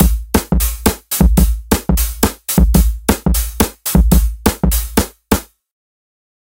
semiQ dnb dr 019
This is part of a dnb drums mini pack all drums have been processed and will suite different syles of this genre.
drum, percussion-loop, bass, break, drums, rhythm, breakbeat, drum-loop, loop, beat, jungle